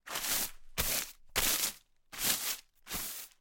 A crumpled up piece of paper being flattened back out again.